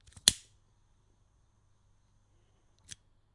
Lighting an electronic cigarette lighter from Komazawa, Tokyo, Japan. The lighter is emblazoned with the word "Tadaima" = welcome home. Recorded in mono with an AKG 414, Fredenstein mic amp, RME Fireface interface into Pro Tools
tobacco, fire, Lighter, sentimental